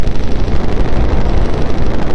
from a ableton live project: "tedgdgfh Project", short computer synth machine-type sound
machine-sound, synth